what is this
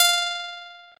Plucked
Guitar
Single-Note
Guitar, Plucked, Single-Note